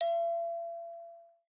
Kalimba High F 2
Take 2, High F
kalimba, instrument, world-music